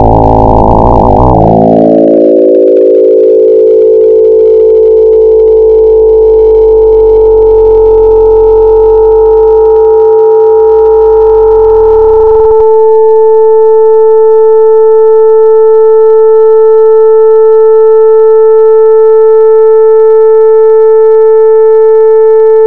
from low 55hz sound, to more simple 440hz sine sound.
made from 2 sine oscillator frequency modulating each other and some variable controls.
programmed in ChucK programming language.
programming, sci-fi, sine